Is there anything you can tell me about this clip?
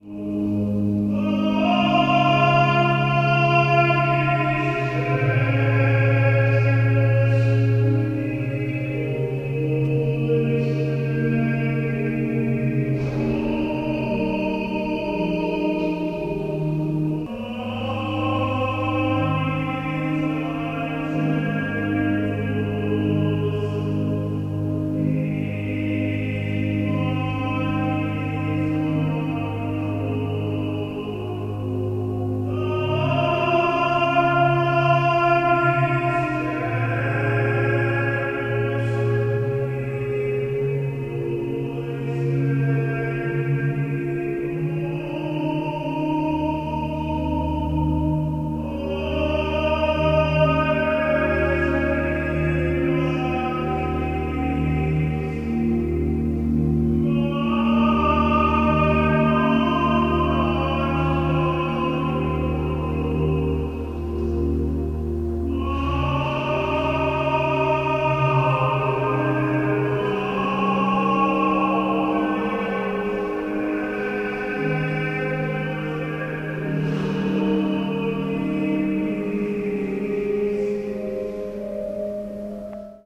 voz na basílica arranjada
reversal a song for a wedding in a church
church, reversal, singing